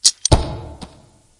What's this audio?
A flintlock pistol firing, made by recording a book drop and a replica hammer mechanism. added reverb and cropped, it's a little quiet, because my mic is awful...
A sound for my game.